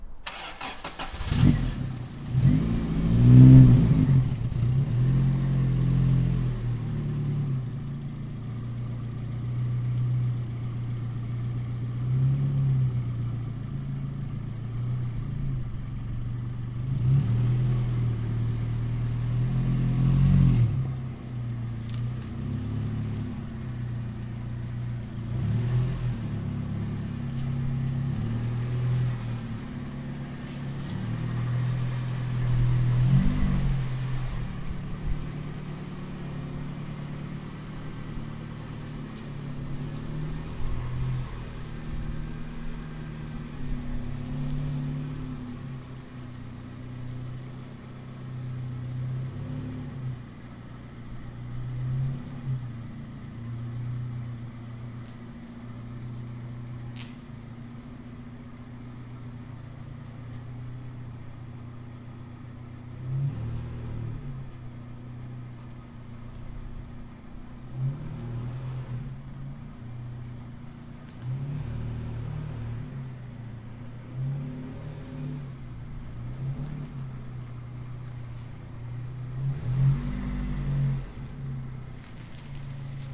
Bil backar
A sound of a backing car recorded as close as possible.